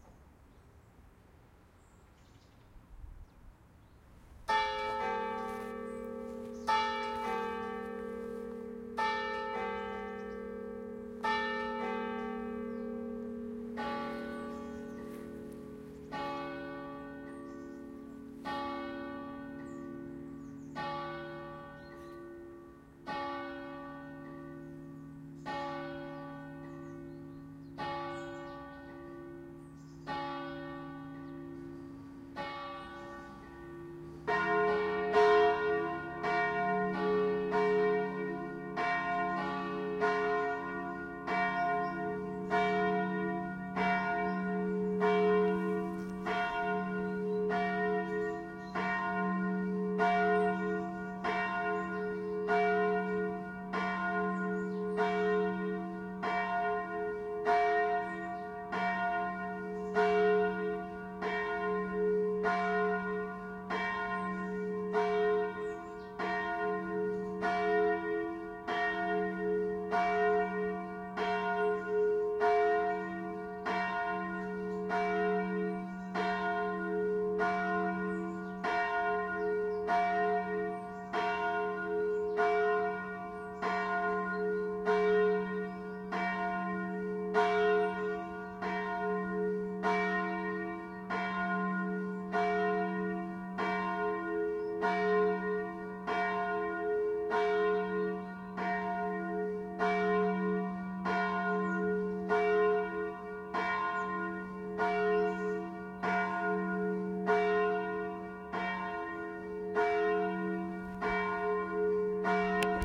Bled Mad Church Bells
h1 zoom. Bells just keeping ringing for many minutes.Starts win one ring and then another one. Stopped recording after a few minutes.